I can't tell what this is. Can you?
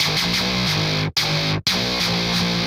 90 Atomik Guitar 08
fresh grungy guitar-good for lofi hiphop
atomic, electro, free, grungy, guitar, hiphop, loop, series, sound